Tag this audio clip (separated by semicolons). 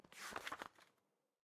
Book; Open; Page; Paper